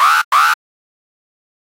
3 alarm short b
2 short alarm blasts. Model 3